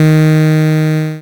game fail
game-over, fail, game, lost, end, over